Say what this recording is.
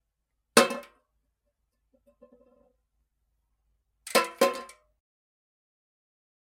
Throwing something into a bin,OWI
Throwing something away into a bin
bin, garbage, bottle, throw, rubbish, container, trash